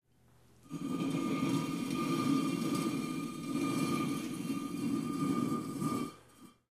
Dragging a cinderblock across a concrete floor.